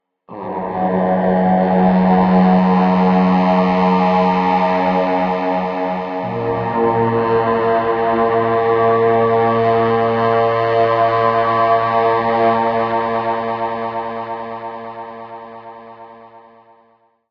For creating this sound I used:
Handmade didgeridoo of pvc tubes
Sound picked by microphone AKJ-XMK03
Effects used in post:
In Guitar Rig 4:
1. Tube compressor
2. AC Box amp